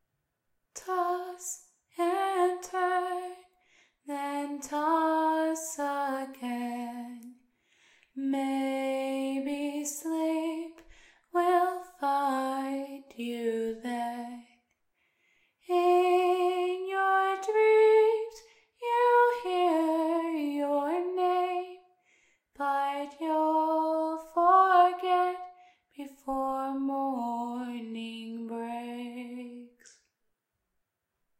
Nameless child
Another little melody I came up with. Again free for anyone to use, all I ask is that you recognize me as the writer and vocalist! Thank you :)
echo, haunting, melodic, music, Original, sad, simple, singing, song, vocals